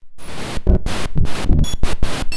Tune in
Made on a Waldorf Q rack
interference, radio, static, synthesizer, waldorf, white-noise